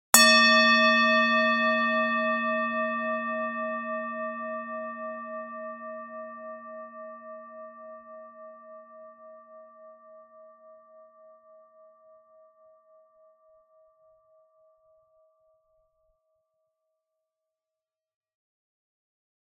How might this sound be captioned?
Singing bowl struck hard with mallet.